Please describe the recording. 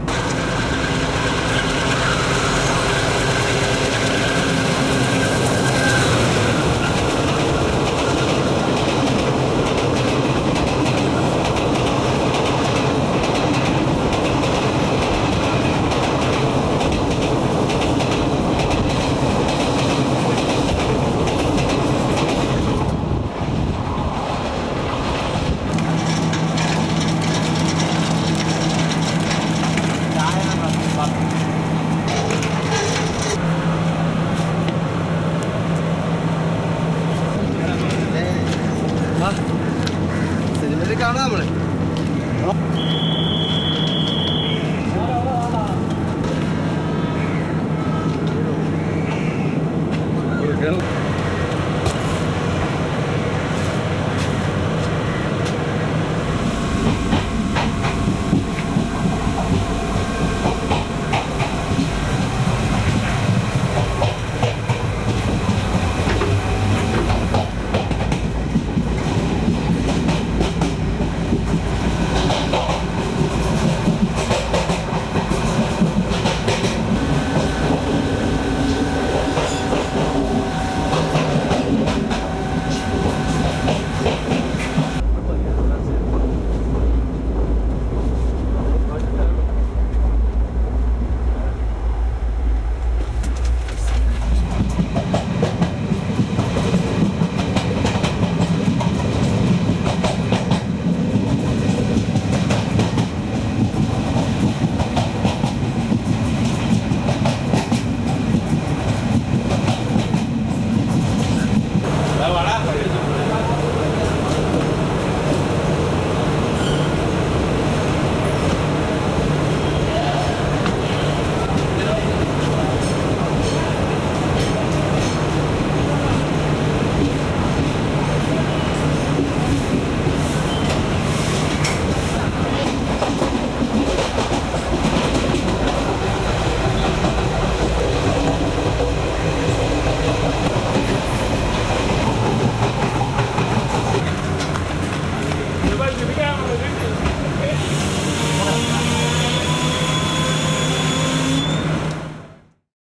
India Train Ride (Train passing by, Voices, Whistle, sounds inside while riding, Train stopping)
India, First a train passes by at the tracks next to the microphone, then you hear the sounds from inside while on the way, the diesel engine, the usual rattling, and the train stopping.
Diesel, Engine, India, inside, Locomotive, Motor, Passing, passing-by, Public, rattling, Ride, sounds, Transportation